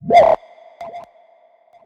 Oh yeah, my filter is talking.
I like the part where I put some delay at inhuman parameters, making that glitch repeated sound.
delay, experimental, filter, sweep